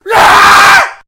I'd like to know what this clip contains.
Male screaming out loud. Recorded using a Rode NT2-a microphone.
666moviescreams, Male, NT2-a, Rode, Scream, Shout, Shouting